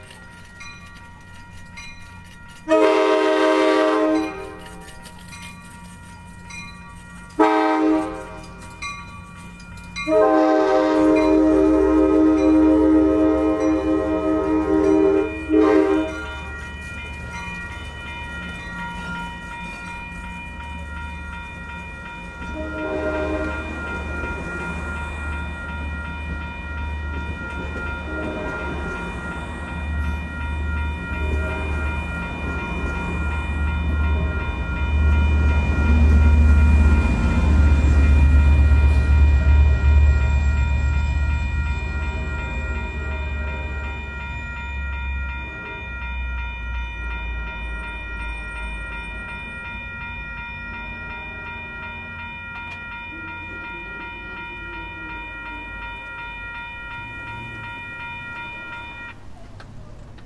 An Amtrak crossing on a sunny afternoon in Santa Barbara (13.4.2016).